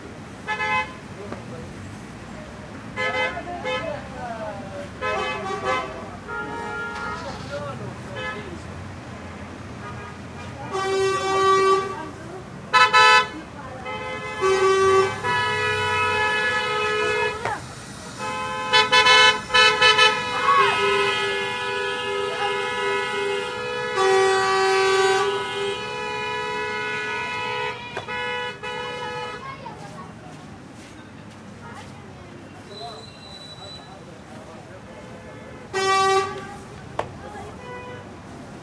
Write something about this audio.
auto horn honks angry close echo car blocking road to take passenger slowly Gaza 2016
honks, horn, close, angry, echo, auto